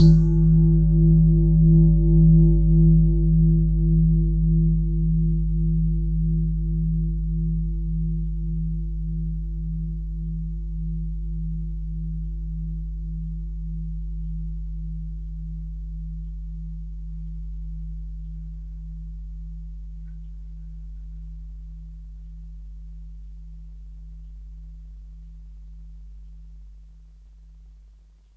Strike massive thick saw blade (100 - 120 cm)